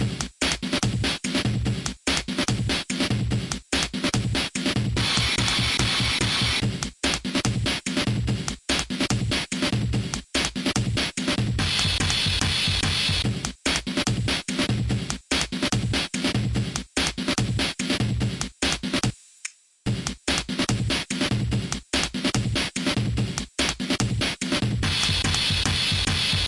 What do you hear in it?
weird amen
a weird version of the amen loop.
145, amen, beat, bpm, break, chopped, drum, drums, perc, percs, percussion, sampler